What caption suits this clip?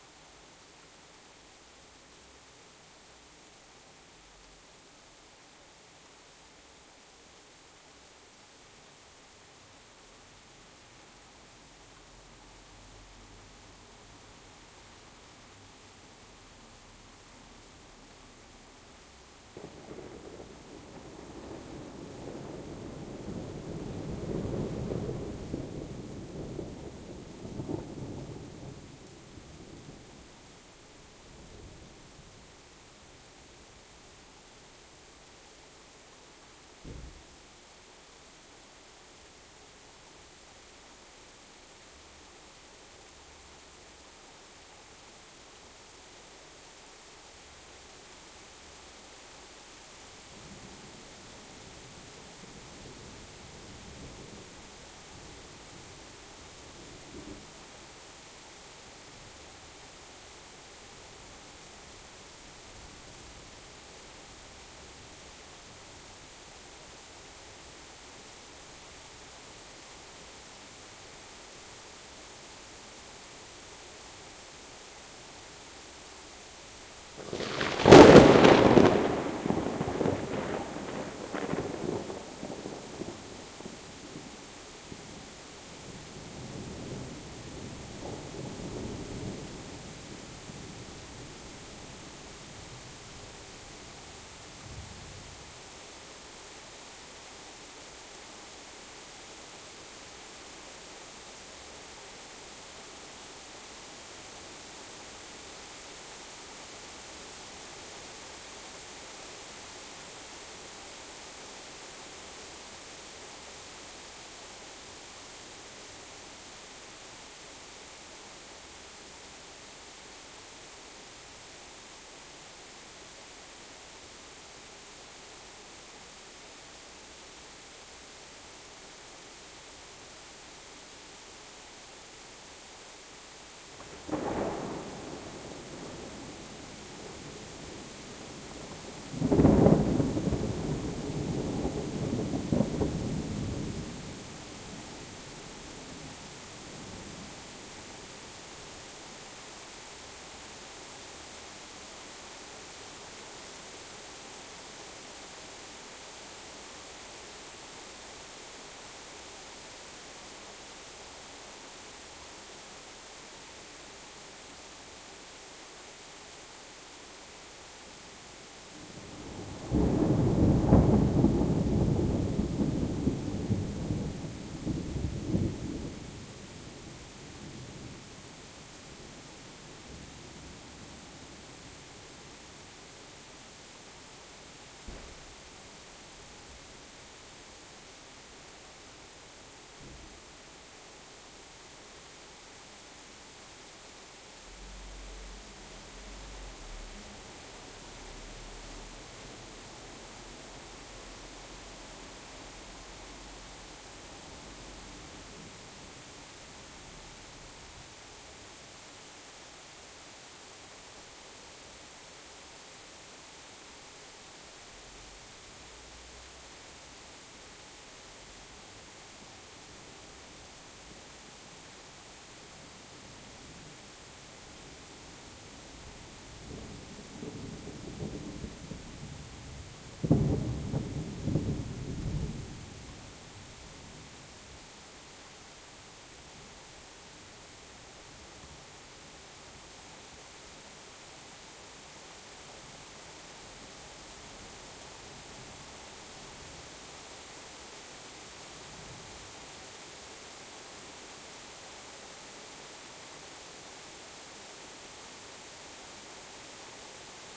Rain and Thunder 01
Rain with one close, loud thunderclap and some smaller ones in a quiet city. Recorded with an Olympus DM-550 on wide directivity mode (DVM).
weather, close, near, rain, rainstorm, loud, lightning, thunder, storm, city